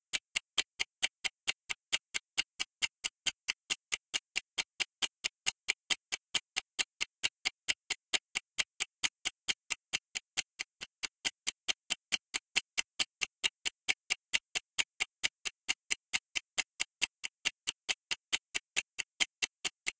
Ticking Timer 20 Sec
Ticking Timer
If you enjoyed the sound, please STAR, COMMENT, SPREAD THE WORD!🗣 It really helps!
time, tock